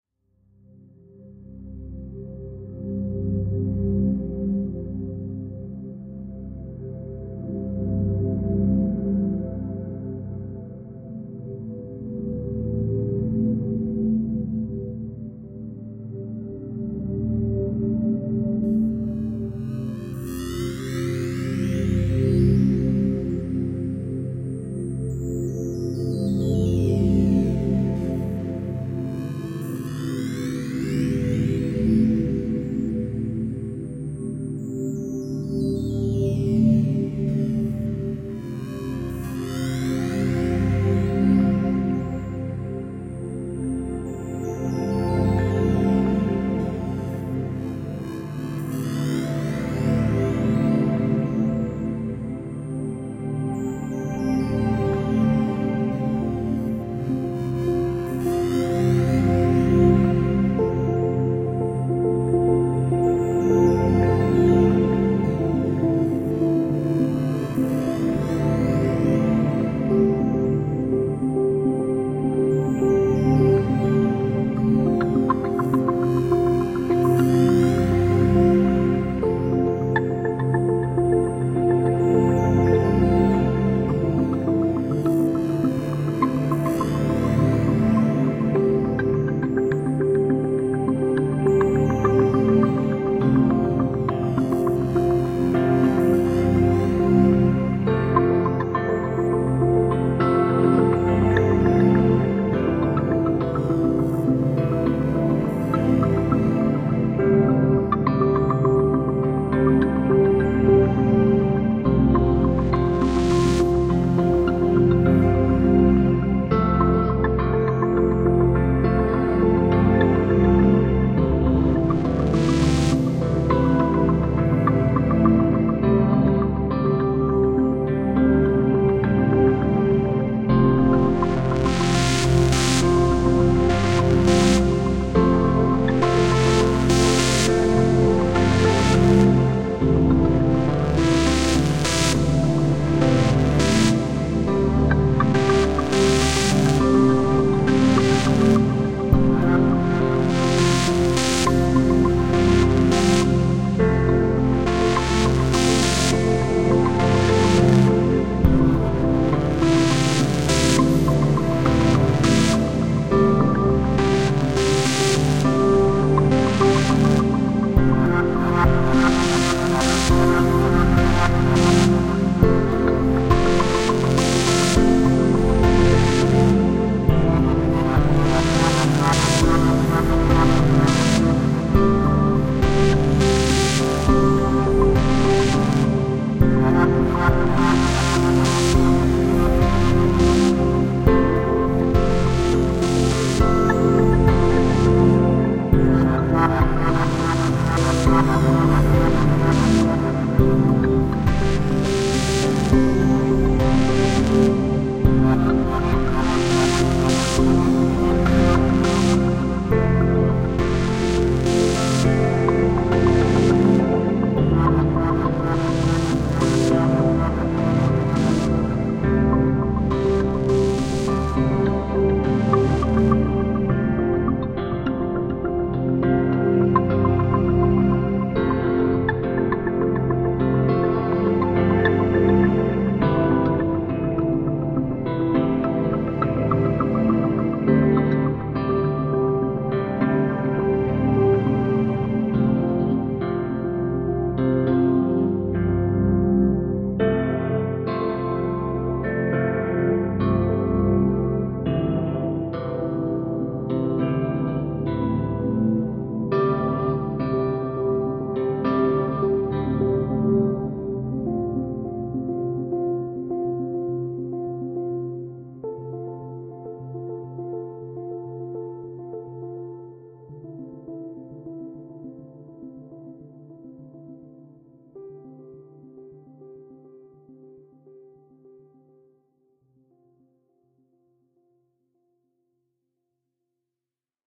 Time Is Running Out track with nice and warm sound. Track features piano and guitar. Space pad. Plugins Omnisphere. Ableton 9, 11 Midi Channels.BPM 103.